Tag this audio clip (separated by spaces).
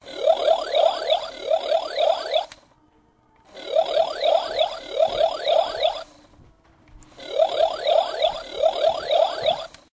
robot scifi